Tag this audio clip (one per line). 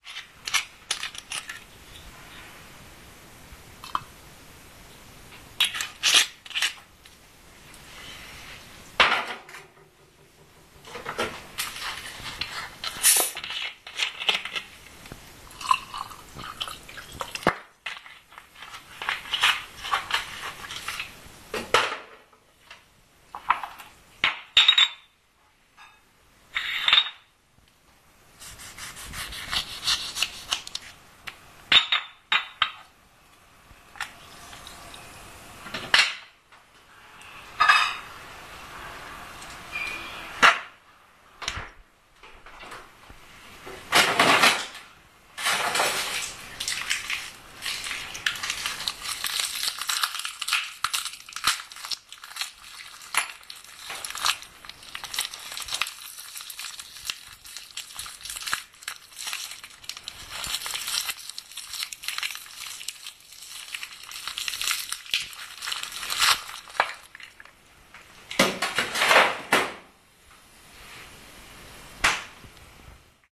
field-recording
poznan
preparing-drink
refrigerator